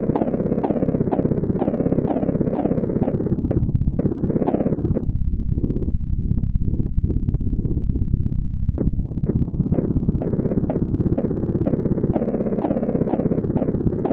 15-fluid pump
"Interstellar Trip to Cygnus X-1"
Sample pack made entirely with the "Complex Synthesizer" which is programmed in Puredata
idm, ambient, analog, pd, modular, experimental, rare, puredata